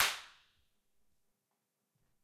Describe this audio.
Recorded on a Tascam MSR16 4 Claps two per Track. There might be some low freq noise, since other instruments bleeded from other tracks of the machine, but thats why they are called "dirty drum samples" :) Can be layerd to get a Gang-Clap.
Tape, Analog, Gangclap